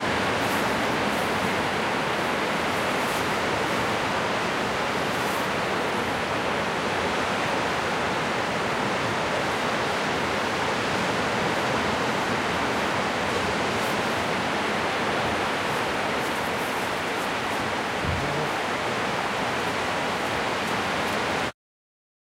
The Sea 01
Sea, Rocks, Waves, Water, Beach, Ocean, Wales, Ambience